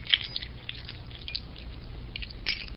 Car keys with plastic remote
car, jingle, keys